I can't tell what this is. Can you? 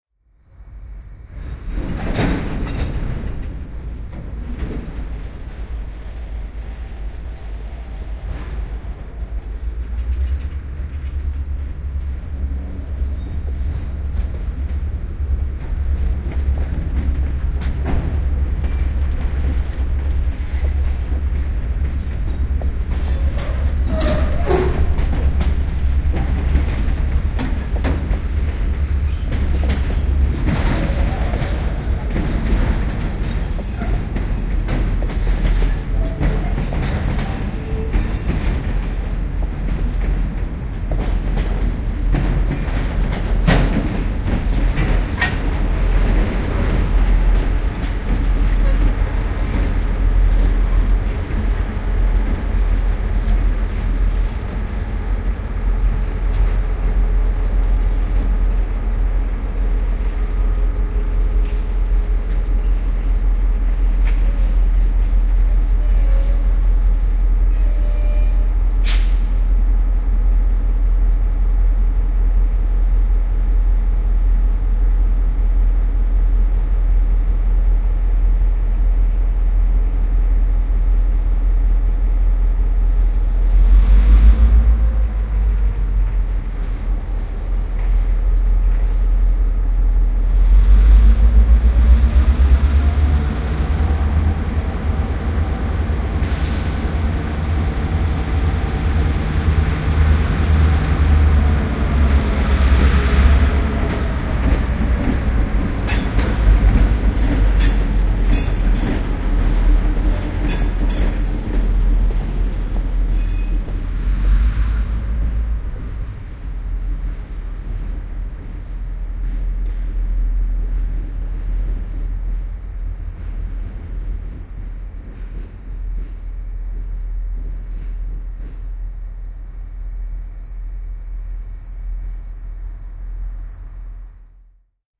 Train at Ford Plant 4
Stereo recordings of a train in the train yard at the Ford Assembly Plant in St. Paul, MN. Recorded with a Sony PCM-D50 with Core Sound binaural mics.
engine, field-recording, industrial, train